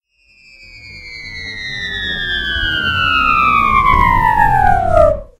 Bomb Dropping
The whistling SFX created when a bomb approaches from the skies. Created with Audacity.